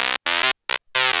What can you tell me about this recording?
synthlead full1
synthe string done with a damaged Korg Polysix
synthe, korg